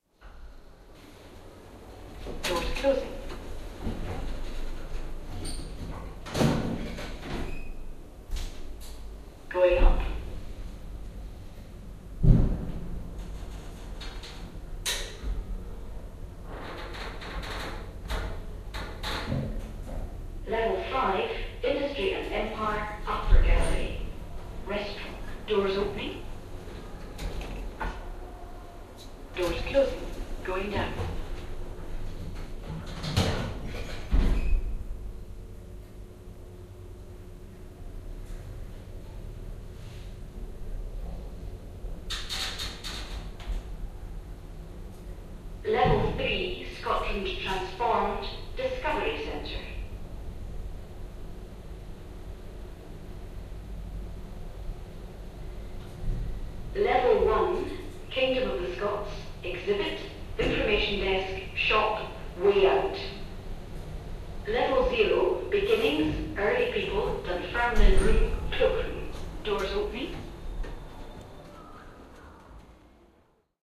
The sound of a Lift/elevator recorded in the National Museum of Scotland, Edinburgh.
Recorded on a Sharp Mini disc recorder and an Audio Technica ART25 Stereo Mic
scotland, lift, museum, elevator